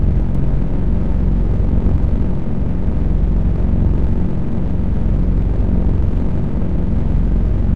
Synthetic noise loop that is somewhat reminiscent of a bunch of WWII era bombers, or something similar. I don't know what it should be called or how to describe it. But it is a seamless loop, if I remember correctly. I created this in Cool Edit Pro a long time ago.
engine, noise, bomber, background, loop, rumble, ambient